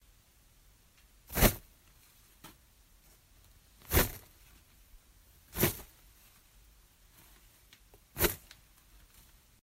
grabbing tissues out of a tissue box
cotton, tissue